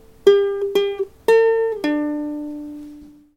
short ukelele phrase, single notes, G4 G4 A4 D4
I shortened that recording to its last four notes, then included a fade out to eliminate most of the non-ukelele sound at the end.
This recording has notes as follows: G4 G4 A4 D4.
This sound was originally created for the Coursera course "Audio Signal Processing for Music Applications." I recorded this sound myself with a Zoom H2 microphone and a Kala classical ukelele.
notes, single-notes, ukelele, G4, D4, A4, acoustic-recording, strings